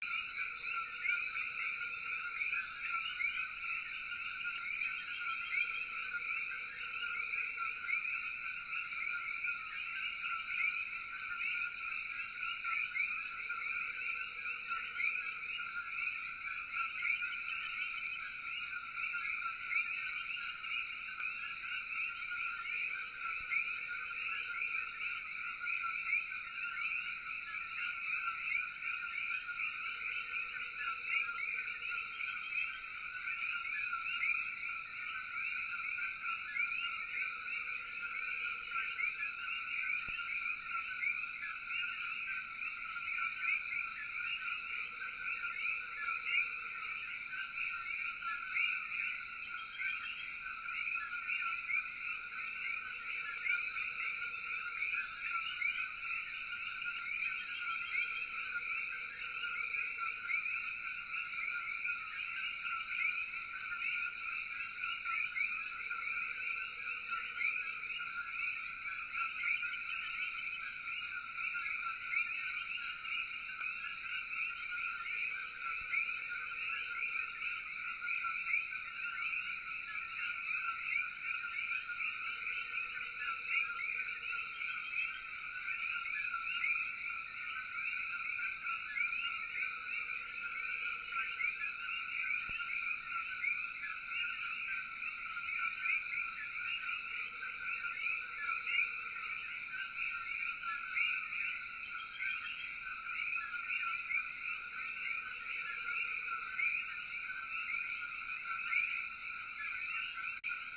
COQUIES EN CAYEY
coquies en mi casa
field-recordin, Purto, Rico